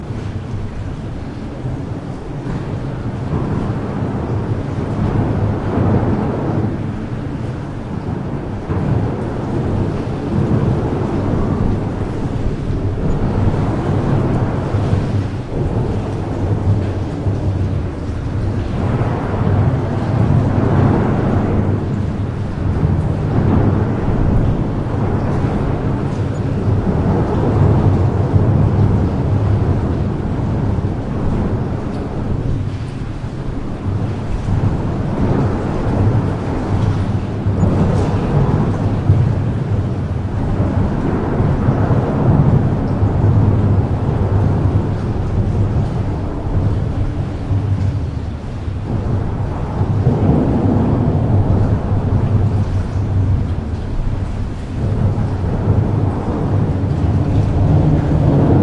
This is a recording from underneath the Bay Farm drawbridge in Alameda, CA. Nice reverberating bass as cars drive over. Some light water splashing from the estuary.
AudioTechnica AT22 > Marantz PMD660 > edited in Wavelab